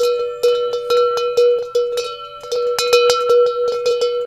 Bell loop
recording of a random bell in a music store in denton texas with a Sony dat machine, sampled and looped with a k2000
loop, acoustic, bell, percussion